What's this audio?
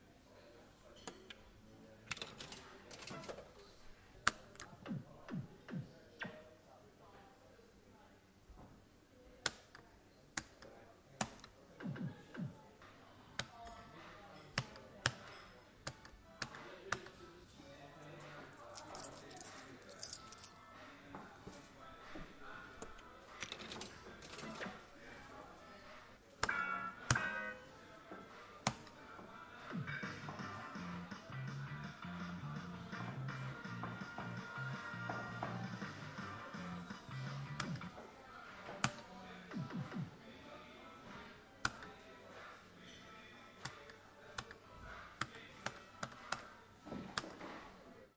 Bar Slots Gambling Machine - Game
Slots machine in a bar. Some game musiс and SoundFX. Some noise in the background.
slots, arcade, casino, coins, gaming, games, gambling, bar, game